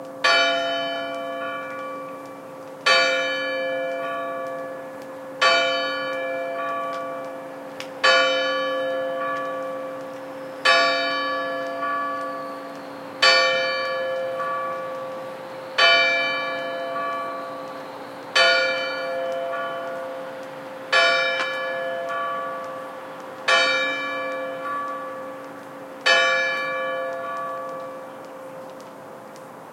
Church Clock Strikes 11
The church bell strikes 11 oclock